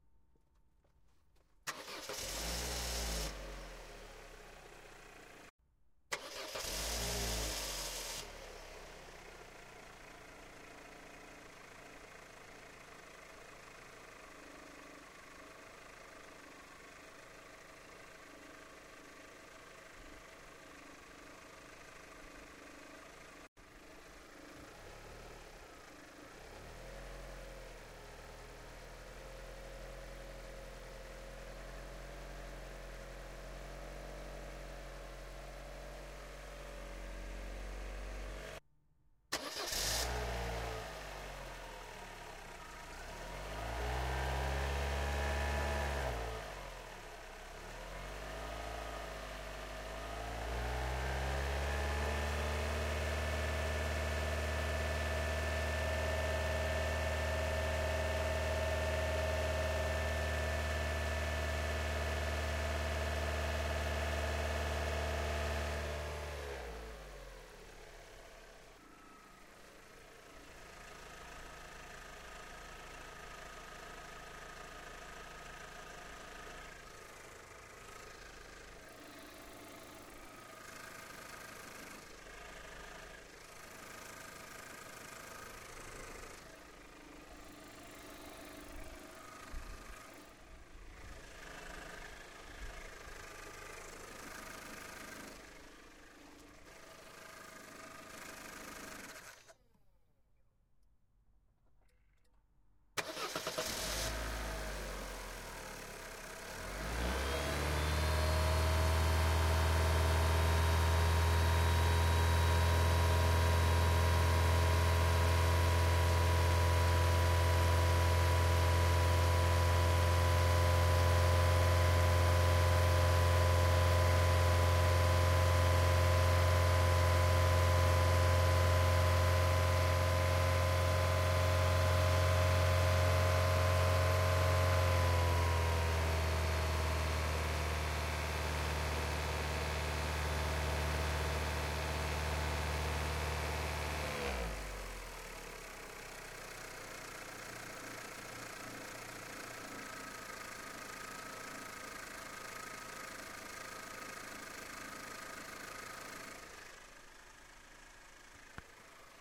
Car Engine Ignition Running and Turn Off
Motor Engine of an Opel Astra 1.6_16V. Recorded with a Zoom H2.
Also available under terms of GPLv2, v3 or later.
Photo:
Opel Astra 1.6_16V Engine
ignition, engine, motor, jet, run, speed, auto, stutter, gas, car, off, loop, fast, automobile